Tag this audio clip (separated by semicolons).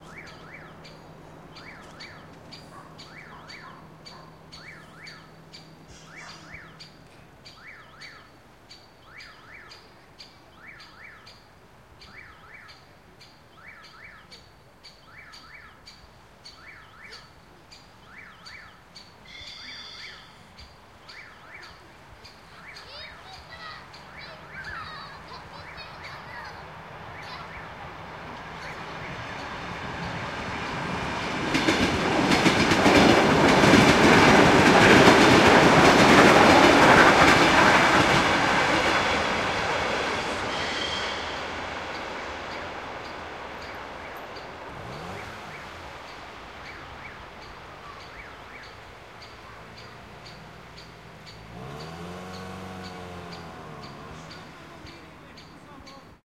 crossing; electric; fast; h2; kids; passing; railroad; signal; train; vehicle; zoom